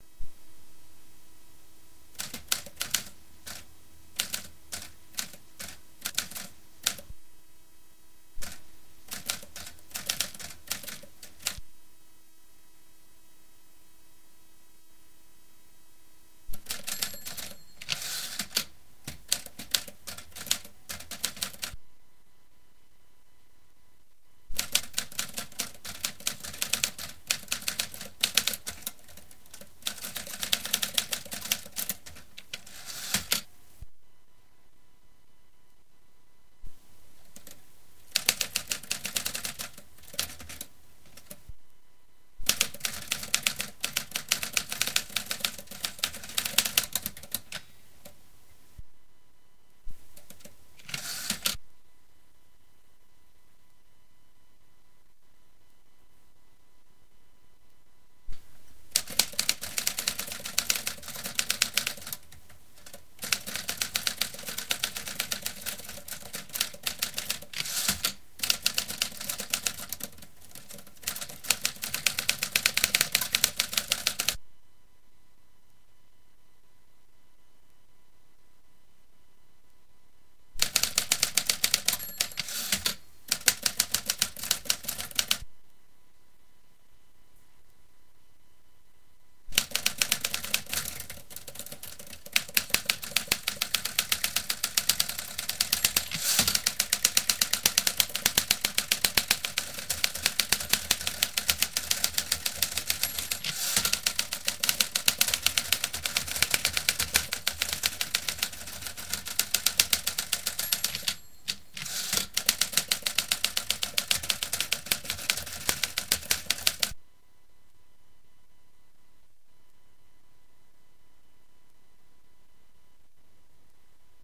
manual typewriter some end returns